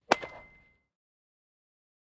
baseball-hit-03
Processed sound of a baseball hit to make it have more impact. I reverbed some frequencies, and mixed several versions. Version 3